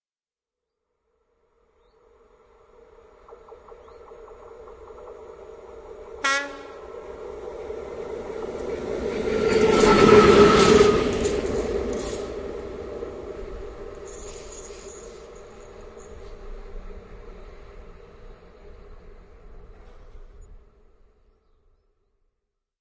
lokomotiva sama + houkání
locomotive itself without car, whoop;
2x micro RODE MT-5, XY stereo, M-Audio recorder
ride, around, train, railway